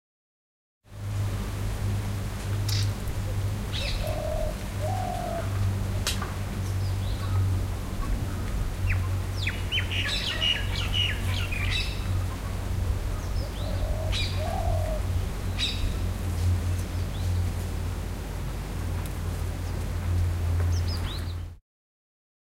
ambient sound
residual noise / Atmospheric with birds in the background
Atmosphere, Farm, Field-Recording